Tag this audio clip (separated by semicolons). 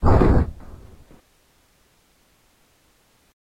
collision-sounds; tcm-racing; used